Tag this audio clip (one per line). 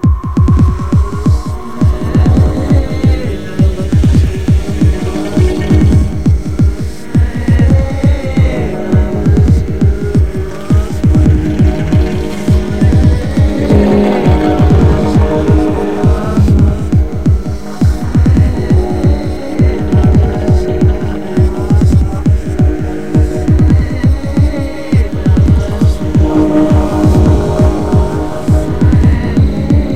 beat
processed
matrix
remix
layered